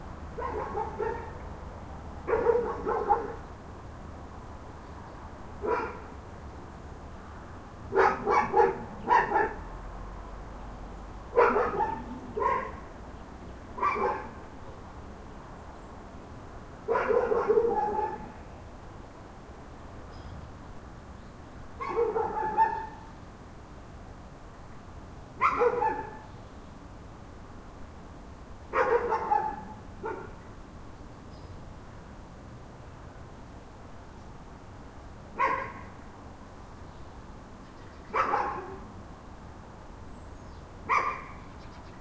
A short clip of a few dogs barking in danish :) , recorded with the
build in microphones of a Zoom H2 recorder. The noise in the background
is that of the city and some wind.
barking, denmark, dogs, field-recording, suburb